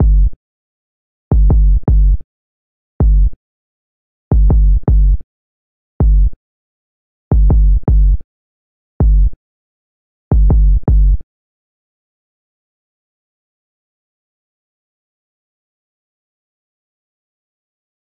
ep7-kick
bass-drum, drum, kick, loop